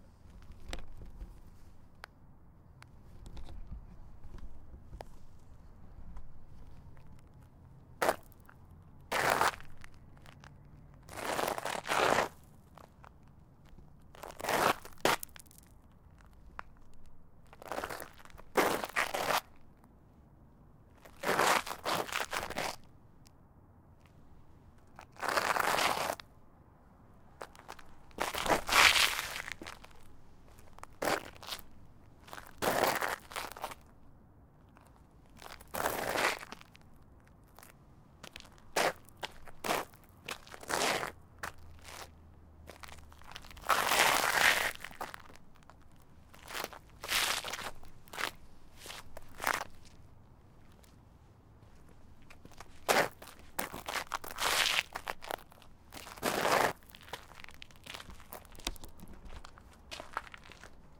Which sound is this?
Dragging my sneakers against gravel on asphalt.
Recorded with a Sennheiser MKH 416.
sneakers, foley, asphalt, footsteps, walking, gravel, walk, steps